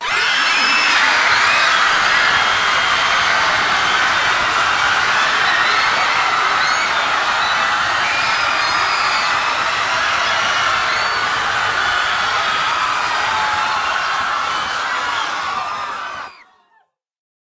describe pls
Crowd Screaming
The scream used in Competition Karma.